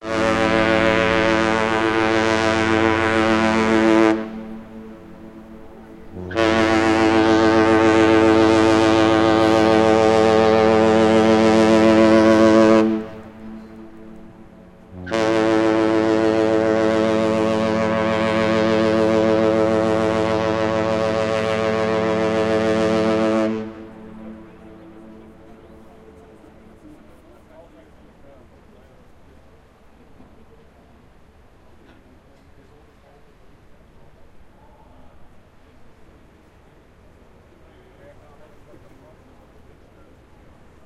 Ship Horn
an impressive horn of a giant cruiser ship in the harbour of Hamburg